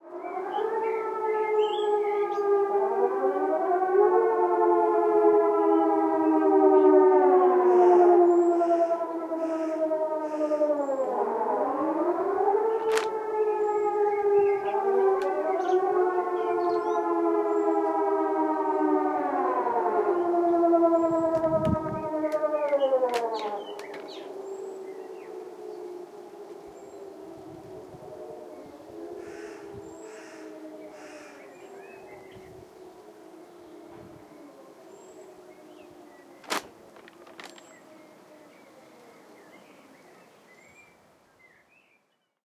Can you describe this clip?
Air raid warning sirens 1 (07 may 2014)
Every year, at midday on the 1st Wednesday in May, 1,078 air raid sirens across Denmark are tested. I originally misidentified these as warning sirens from the local oil refinery. There are 3 signals:
12:00: "Go inside"
12:04: "Go inside"
12:08: "Danger is passed"